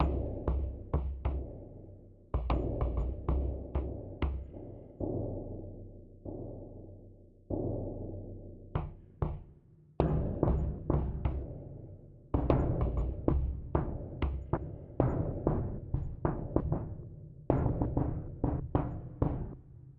3rdBD L∞p-96BPM-MrJkicKZ
Third Bass Drum L∞p 96BPM - Dogfish Squalus Acanthias
You incorporate this sample into your project ... Awesome!
If you use the loop you can change it too, or not, but mostly I'm curious and would like to hear how you used this loop.
So send me the link and I'll share it again!
Artistically. #MrJimX 🃏
- Like Being whipping up a crispy sound sample pack, coated with the delicious hot sauce and emotional rhythmic Paris inspiration!
Let me serve you this appetizer!
Here you have a taste of it!
- "1 Drum Kick L∞p-104BPM- MrJimX Series"
- "Second Bass Drum L∞p 100BPM - URBAN FOREST"
4-4, 96BPM, Acanthias, Bassdrum, Dogfish, Groove, Kick, Loop, MrJimX, MrJkicKZ, MrJworks, Squalus, works-in-most-major-daws